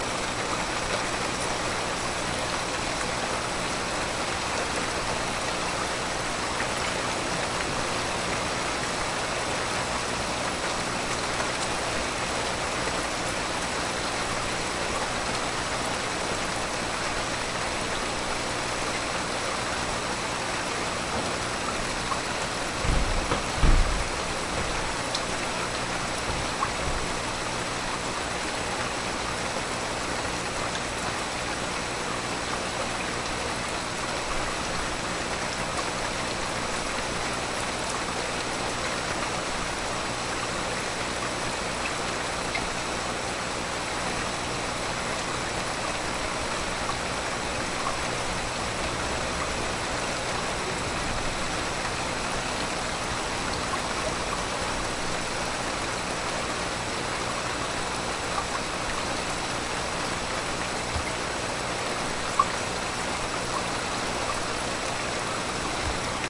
This is another recording of the rain using my Speed HD-8TZ.
To record this I opened my bedroom window and didn't use any professional equipment.
This sample can be used for any projects or pieces of work you want, I made this myself to use in a film, and I hope it's OK.